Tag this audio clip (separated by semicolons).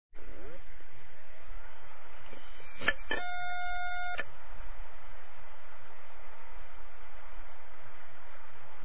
beep; beeping; computer; digital